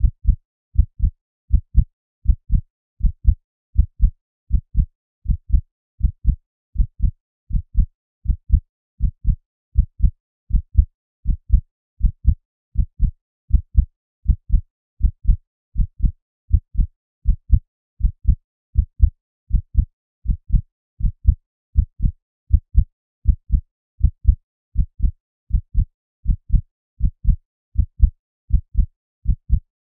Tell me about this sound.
A synthesised heartbeat created using MATLAB.